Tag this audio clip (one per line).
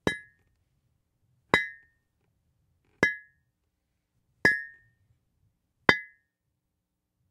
concrete-block
effect
hit
stone
strike